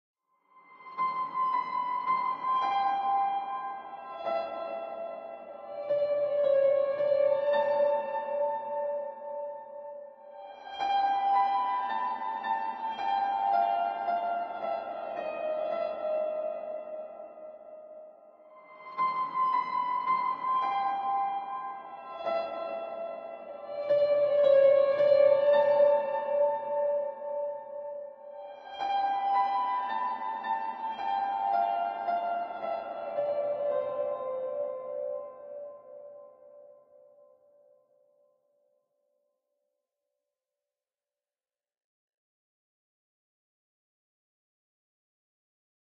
ghost dreamer
This is a little different from the original ghost piano. Here we have a plodding rendition of the opening bars of "Beautiful Dreamer" on a broken-down piano with the weird "preverb" applied, where you start to hear the reverb before the sound actually plays. This gives it a somewhat otherworldly effect.
FL Studio 12.
creepy, piano, haunted, spooky, ghost, reverb